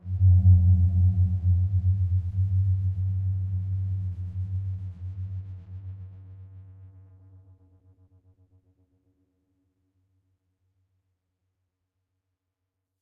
giant dog I
The original source of this sound is a record of a dog bark, transposed and heavily processed. A bit of crossover distortion gave it a "synthetic feeling". The sound was recorded with a Tascam DR100 and the processing was done with Audacity.